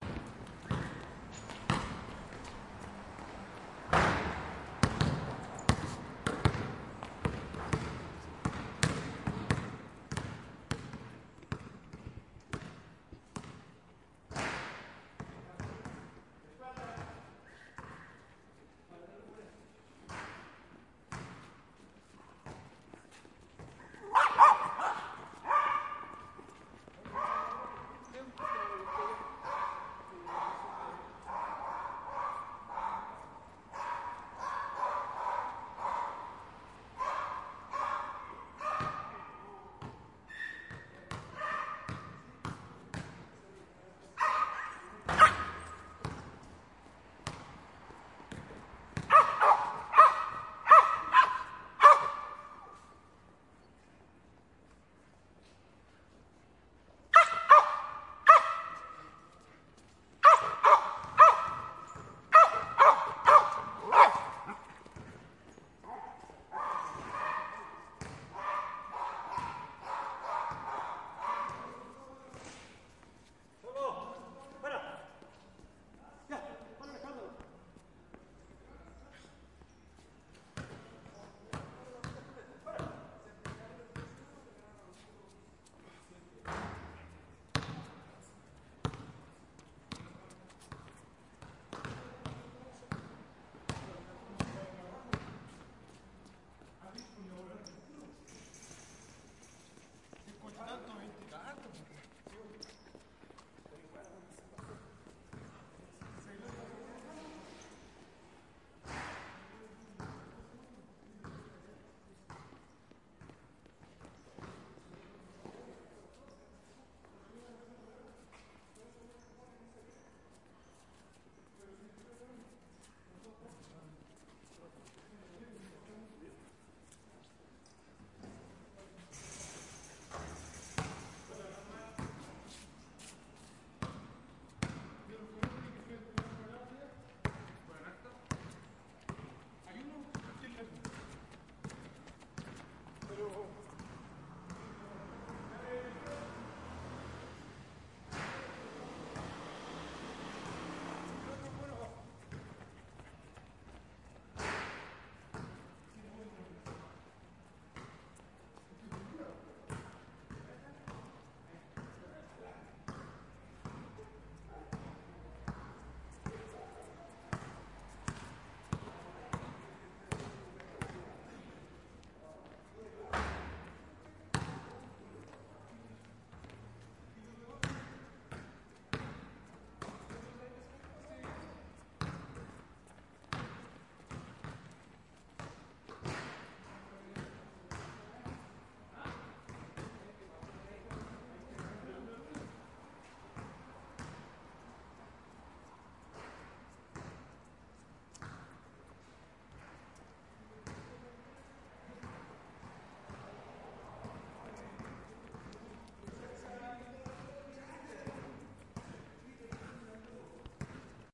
ambient of an basketball players in Santiago de Chile.